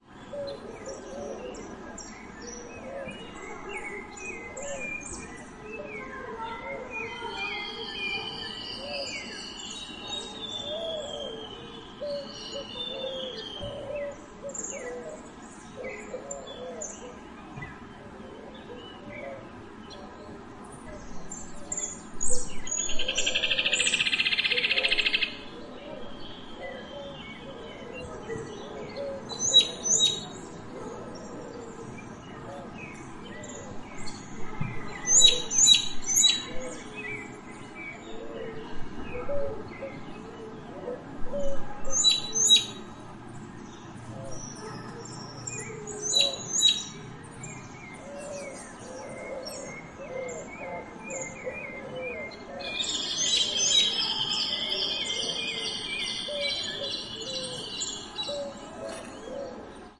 Countryside ambience loop created for Ludum Dare 42 game jam; made from a recording i did some years ago in Cerro Azul, Cordoba, Argentina; during summer. Recorded with Zoom-H6 and edited with Adobe Audition.